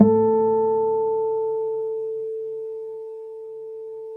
A 1-shot sample taken of harmonics of a Yamaha Eterna classical acoustic guitar, recorded with a CAD E100 microphone.
Notes for samples in this pack:
Harmonics were played at the 4th, 5th, 7th and 12th frets on each string of the instrument. Each position has 5 velocity layers per note.
Naming conventions for samples is as follows:
GtrClass-[fret position]f,[string number]s([MIDI note number])~v[velocity number 1-5]
The samples contain a crossfade-looped region at the end of each file. Just enable looping, set the sample player's sustain parameter to 0% and use the decay and/or release parameter to fade the sample out as needed.
Loop regions are as follows:
[150,000-199,999]:
GtClHrm-04f,4s(78)
GtClHrm-04f,5s(73)
GtClHrm-04f,6s(68)
GtClHrm-05f,3s(79)
GtClHrm-05f,4s(74)
GtClHrm-05f,5s(69)
GtClHrm-05f,6s(64)
GtClHrm-07f,3s(74)
GtClHrm-07f,4s(69)
GtClHrm-07f,5s(64)
GtClHrm-07f,6s(59)
GtClHrm-12f,4s(62)
GtClHrm-12f,5s(57)
GtClHrm-12f,6s(52)
[100,000-149,999]:
GtClHrm-04f,3s(83)